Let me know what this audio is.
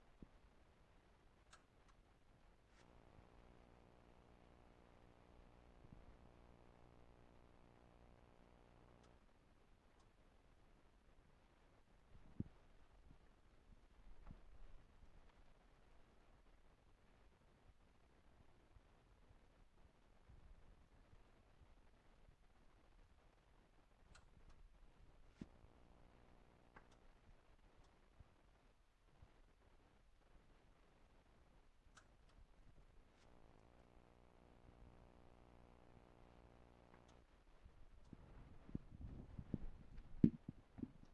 TV on and off
Mono recording of a TV turning on and off.
television, off, click, tv